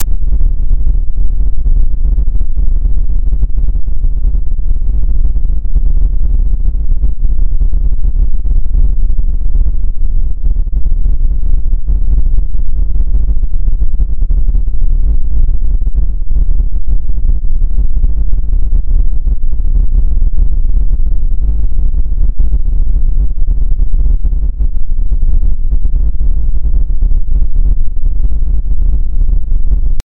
10 LFNoise1 100Hz
This kind of noise generates linearly interpolated random values at a certain frequency. In this example the frequency is 100Hz.The algorithm for this noise was created two years ago by myself in C++, as an imitation of noise generators in SuperCollider 2.
frequency, interpolation, linear, low, noise, ramp